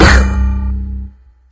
video game sounds games
games, game, video, sounds